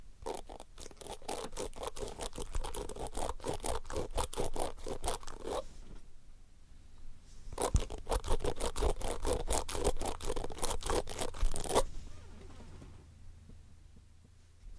Flicking the teeth of a brush